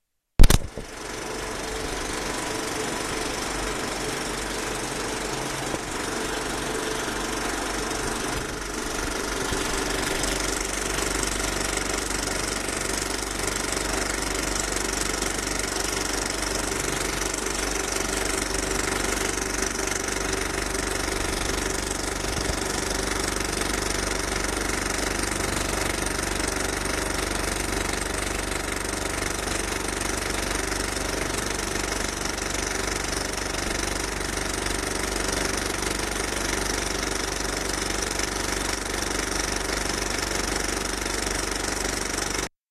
Cinema projector sound, starting and stabilizing.